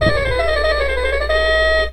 Sounds of electronic toys recorded with a condenser microphone and magnetic pickup suitable for lofi looping.
electronic, lofi, toy, loops, loop